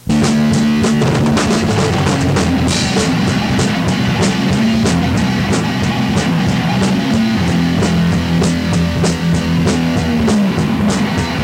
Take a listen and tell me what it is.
An assortment of strange loopable elements for making weird music. A snippet from the cult classic thrash band "Warfare" from a practice cassette tape circa 1987.
band, disorted, noisy